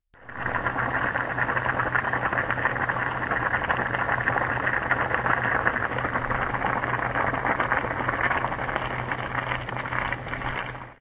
boiling bubbles 1
A small recording of a pot with spaghetti noodles boiling inside. The mix soon simmers quietly to fade out.